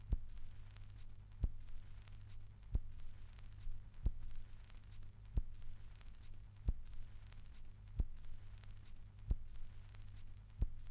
Vinyl crackle and hum.
crackle, hum, record, vinyl